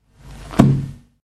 Closing a 64 years old book, hard covered and filled with a very thin kind of paper.